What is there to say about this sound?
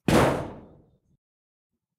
small-metal-hit-10
Metal rumbles, hits, and scraping sounds. Original sound was a shed door - all pieces of this pack were extracted from sound 264889 by EpicWizard.
bell, blacksmith, clang, factory, hammer, hit, impact, industrial, industry, iron, lock, metal, metallic, nails, percussion, pipe, rod, rumble, scrape, shield, shiny, steel, ting